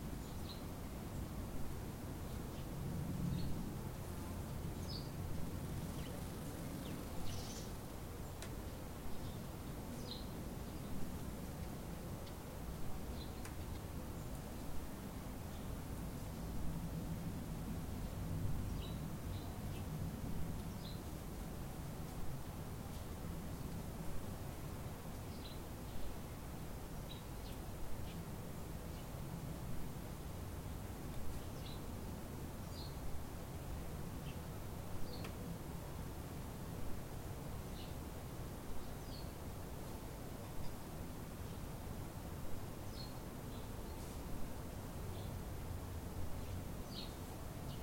Calm garden ambience
ambience, birds, field, field-recording, garden, summer